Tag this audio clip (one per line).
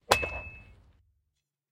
ball
baseball
foley
match
slagbal
ring
hit
strike
honkbal
metal